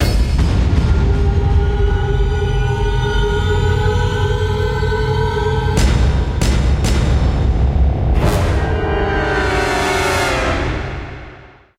Epic End 1
Some little experiment of NI Kontakt, my symphonic section. For cinematic trailer.
Enjoy, my best friends. As always, all the free, all the public.
NI Kontakt sampler, Edison recorder by Fruity Loops.
epic-end, beat, movie, heroic, epic, outro, trailer, film, cinematic, dramatic, orchestral, Hollywood, dynamic, intro, drum, epic-sound